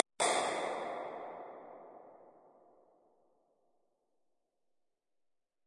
Klick Verbs-14
This is a random synthesized click-sound followed by a reverb with 200 ms pre-delay. I used Cubase RoomWorks and RoomWorks SE for the reverb, Synth 1 for the click and various plugins to master the samples a little. Still they sound pretty unprocessed so you can edit them to fit your needs.
click, crash, digital, downlifter, fx, impuls-response, reverb, roomworks